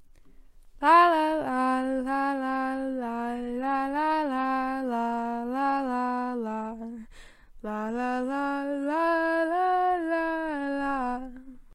feminine voice singing random melody
song, voice, pretty, remix, singing, melody, la, girl